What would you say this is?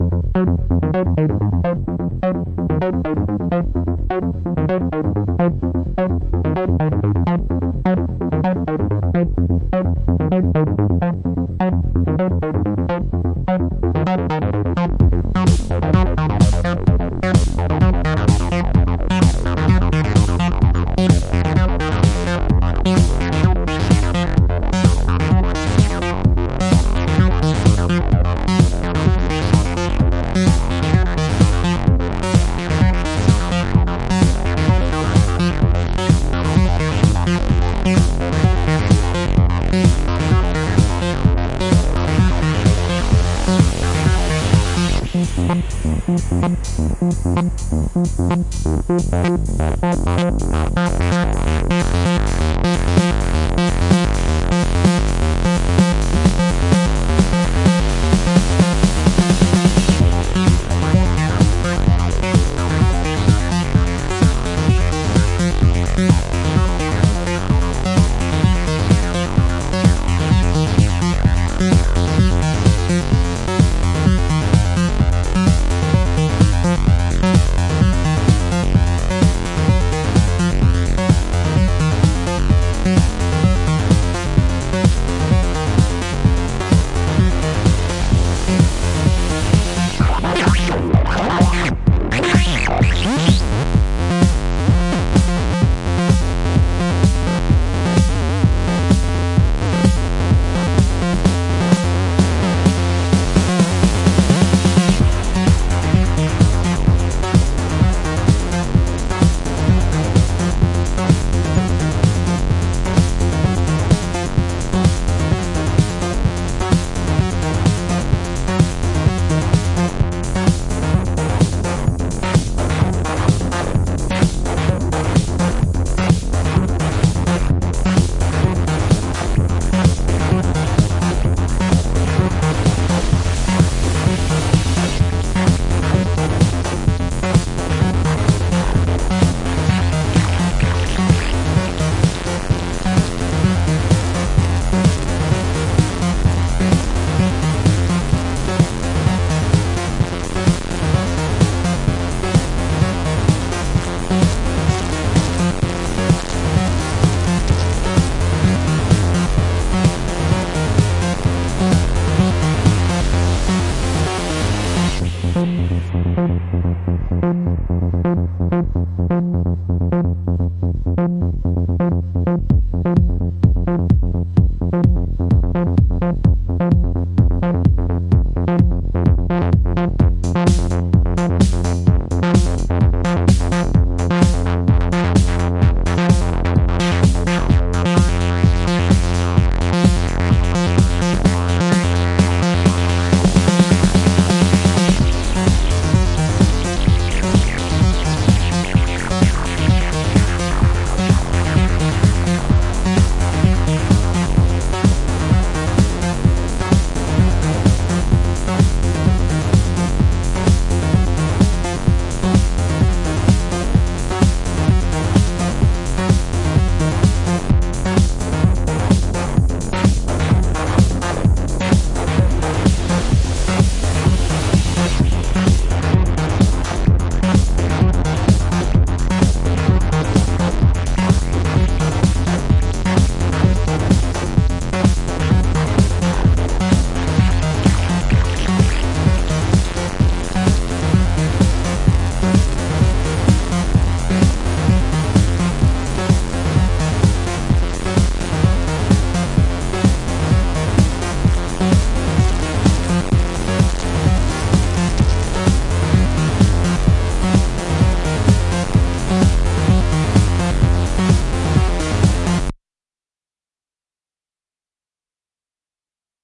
Arturia Acid - 2019-02-04
Acid House / Techno Song
Created With:
Arturia Minibrute
Arturia Drumbrute
Novation Circuit (Drums Only)
Portland, OR
February 2019
128, acid, analog, arturia, club, dance, edm, electro, electronic, Fm, glitch, house, improvised, live, loop, minibrute, minimal, rave, recorded, synth, techno, trance